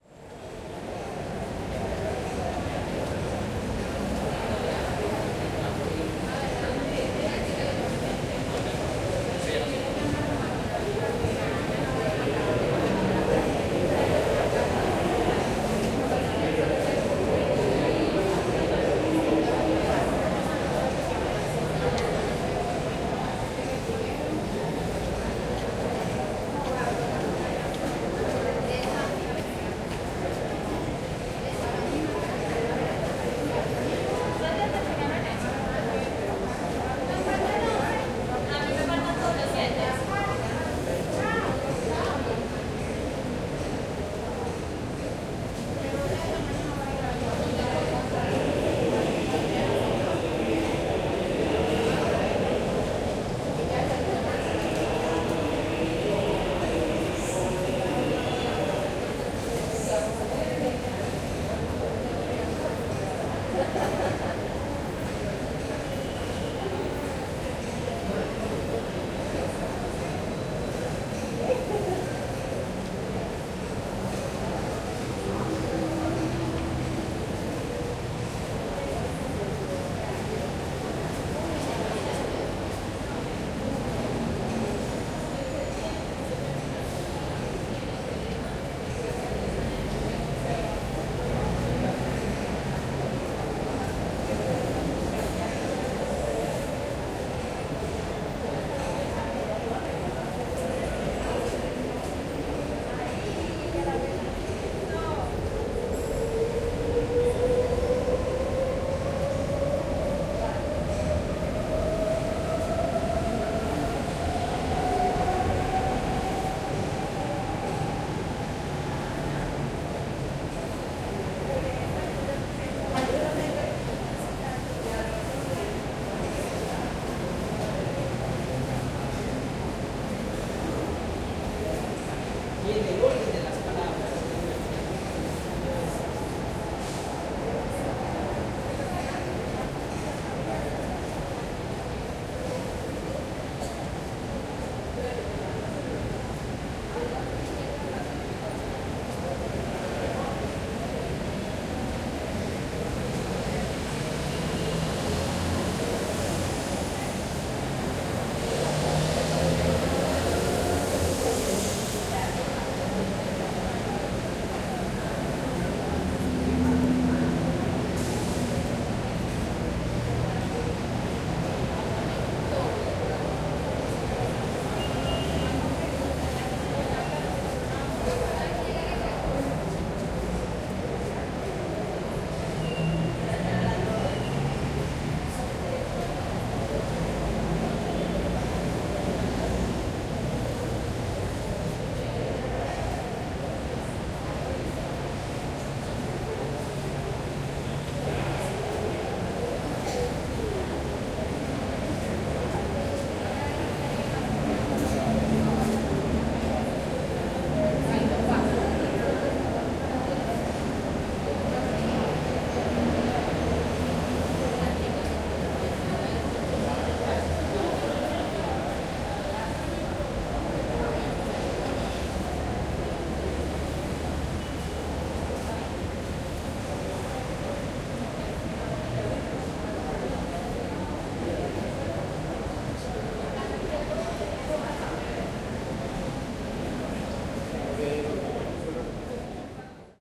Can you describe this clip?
Medellin Metro Outside Walla FormatA
Walla from outside of a Medellin's metro station Ambisonics Format A. Recorded with Zoom H3-VR.